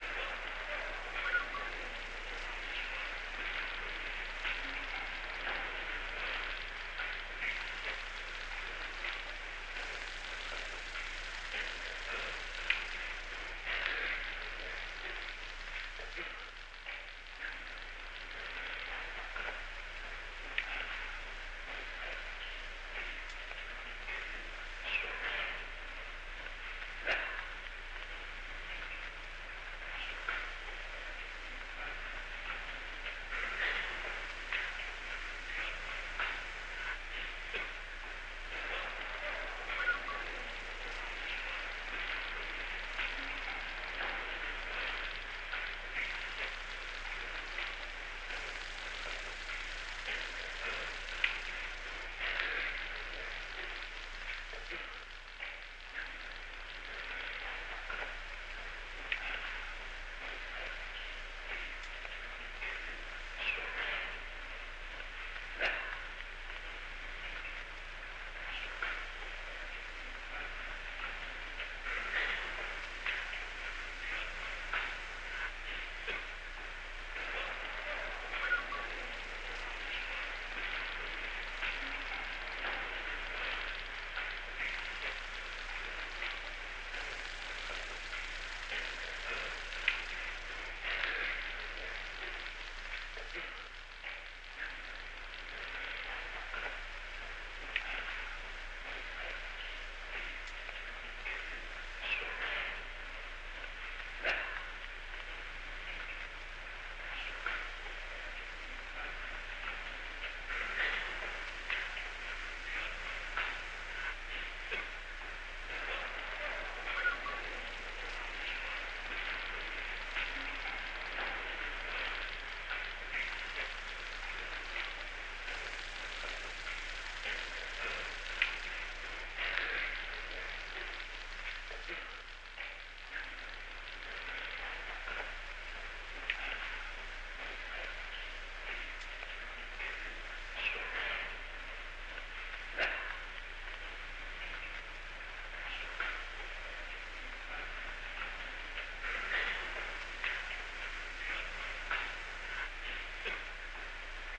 Old Radio Speech Background, higher FF125
Background noise for an old radio broadcast speech (higher pitched)
broadcast, radio, speech